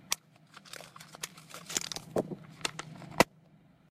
6 recordings of a small plastic first aid kit being opened and examined. Recorded with a 5th-gen iPod touch. Edited with Audacity.
case, first-aid, first, aid, kit, foley, plastic, first-aid-kit, health